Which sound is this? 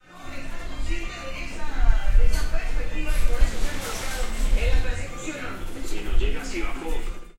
02TOMA 1 Tunel Calle 9 con 10 MAQUINAS Transmisión de tv Jorge Díaz
Sonido de máquinas, televisor de fondo. Trabajo realizado por el proyecto SIAS. Este trabajo se realizó con los estudiantes de Maestría en arte sonoro durante el módulo Paisaje sonoro.
Los registros sonoros fueron registrados por Jorge Díaz, Freddy guerra, Camilo Castiblanco y Lil Letona.
Mayo de 2019
objetos-sonoros, soundobject, paisaje-sonoro, soundscape